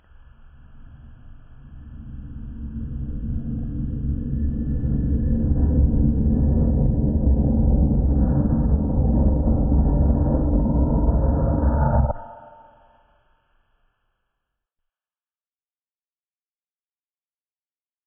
Scary Buildup
Here is a sound I made that could be good for some sort of creepy buildup of suspense
Recorded with Sony HDR-PJ260V then edited with Audacity
buildup, creepy, eerie, ghost, ghosty, haunted, horror, scary, sinister, spooky, suspense, tension